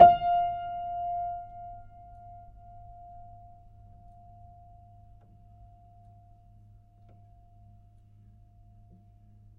My childhood piano, an old German upright. Recorded using a Studio Projects B3 condenser mic through a Presonus TubePre into an Akai MPC1000. Mic'd from the top with the lid up, closer to the bass end. The piano is old and slightly out of tune, with a crack in the soundboard. The only processing was with AnalogX AutoTune to tune the samples, which did a very good job. Sampled 3 notes per octave so each sample only needs to be tuned + or - a semitone to span the whole range.
It is a dark and moody sounding, a lot of character but in now way "pristine".